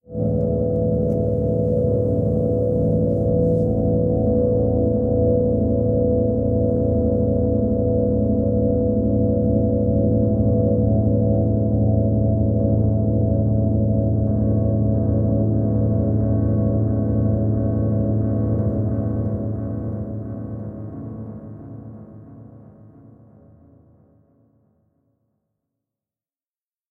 An ambient drone that I found hiding on my hard drive.
ambient, digital, drone, relaxing